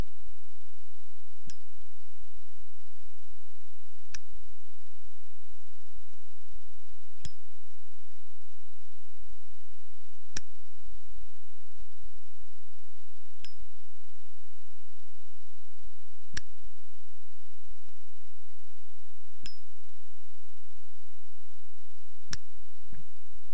The flicking open and closing of an old metal Zippo lighter purchased in Vietnam. Recorded on a crummy low-end "SIMA CamMike". Stereo, unprocessed, a little faint.
lighter, lofi